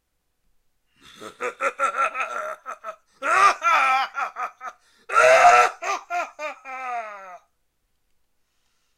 evil laugh-20
After making them ash up with Analogchill's Scream file i got bored and made this small pack of evil laughs.
evil, long, multiple, single